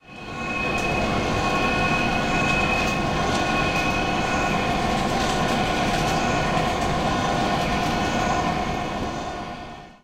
Sound produced when folding a projector screen
This sound was recorded at the Campus of Poblenou of the Pompeu Fabra University, in the area of Tallers in the Classroom number 54.030. It was recorded between 14:00-14:20 with a Zoom H2 recorder. The sound consist in a noisy tonal signal of the screen mechanism being folded.
folding, campus-upf, classroom